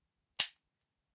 Gun trigger pull 5
Pulling the trigger on a revolver (dry fire). recorded with a Roland R-05
dry, fire, gun, pull, revolver, trigger